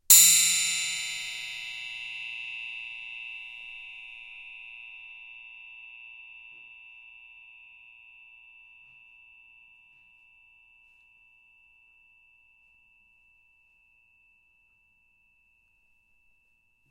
Clang 1 loud - background noise
A metal spring hit with a metal rod, recorded in xy with rode nt-5s on Marantz 661. some background noise